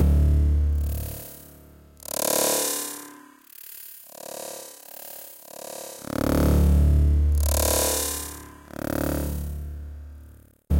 Robot Talk SFX
Sounds like a robot trying to talk. It might be an alien language.